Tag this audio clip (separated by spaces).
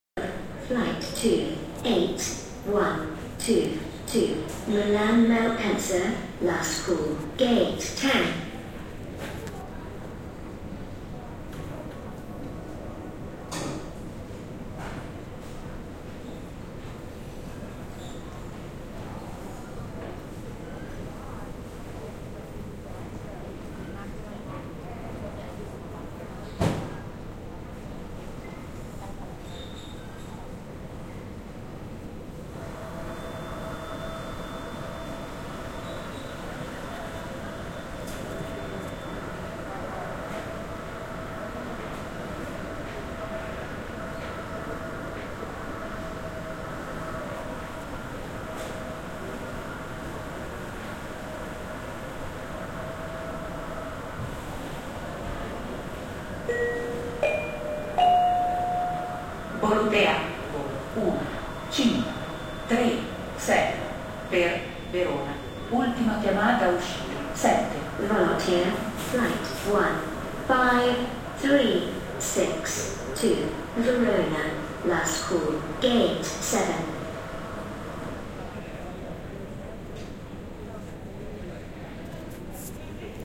Airport ambience environment field-recording people relaxing ttsvoice voice